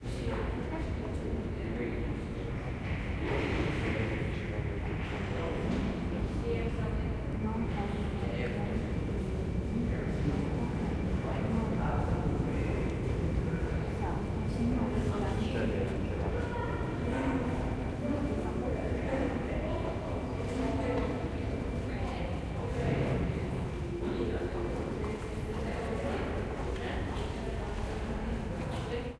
808 Russ Sq ambience f
General ambience of an underground station with the voices of passengers and distant sounds from other platforms. Recorded in the London Underground at Russell Square tube station.